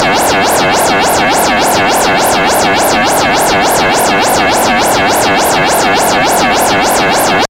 Experimental QM synthesis resulting sound.